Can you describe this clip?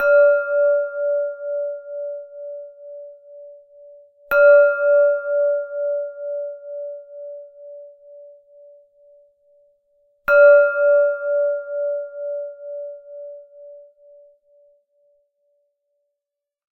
Bruit d'un verre à pied.
Noise of a glass.
ding, dong, glass, son, sound, verre